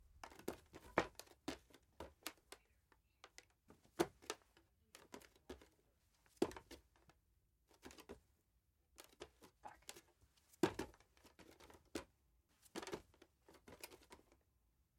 Barefeet Walking on Wooden Floor
barefoot walking on a wooden floor